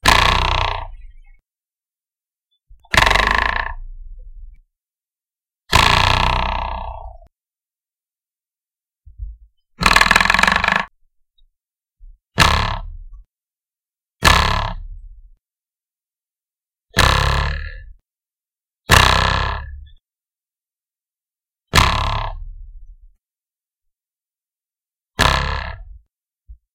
Short twangs cleaned
Twanging a table knife on a desk, recorded with Minidisc and condenser mic indoors, approx 6" distance. Background noise removed with Audacity. Short metallic vibrating noises.
boing, knife, metal, twang, vibration